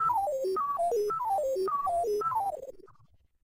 Misdialed Again
Dialed the wrong number.